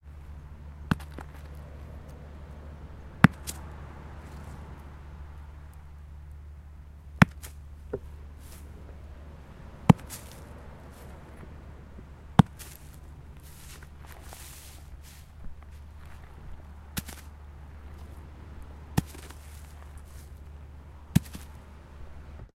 Coloane HacsaBeach Stone Ground
Hacsa Beach Coloane Macau
beach, sea, ground